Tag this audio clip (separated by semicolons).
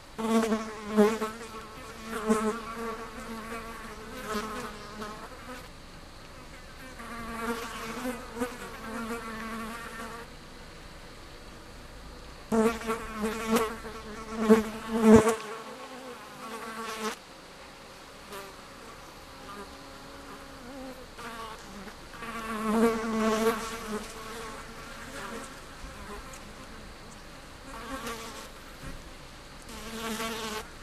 Bccc; williams-mix; flies; country-sounds